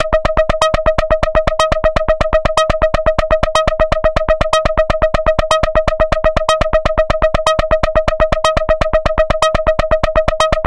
Alien Beeper
Used By Serum & Saturated FX